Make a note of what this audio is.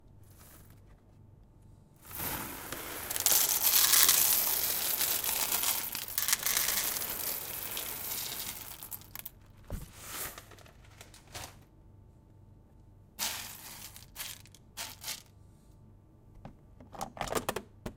coffeeBeans coffeeMachine
Pour coffee beans into container of coffee machine.
XY-Stereo.
coffee-machine, coffee, coffee-beans, office